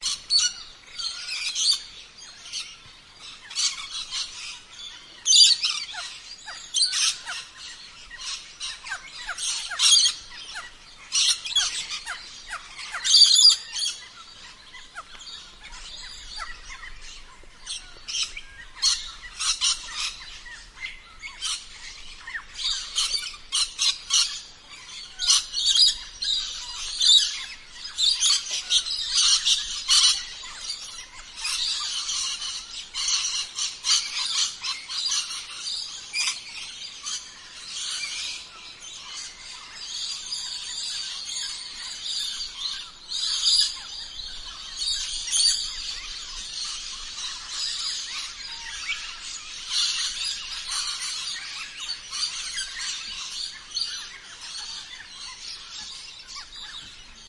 Dawn chorus of Red Collared Lorikeets and other savannah woodland species near the end of the dry season in Kakadu National Park near Waterfall Creek in the Southern part of the park